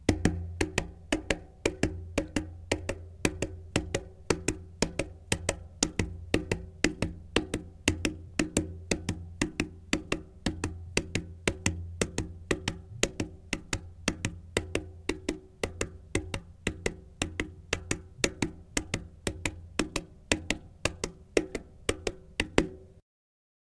Pounding Side Tire
Hands hitting side of bike tire--like a horse running
spinning-wheel, bicycle, bike, whirr, pounding, horse-running, hand, fingers, spinning, rhythm, wheel